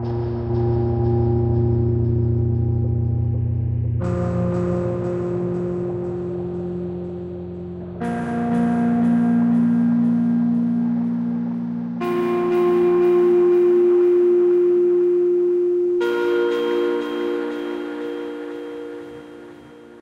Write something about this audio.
Pitched Bell 01
bell, sampled, 2, low, slow, pitched, iris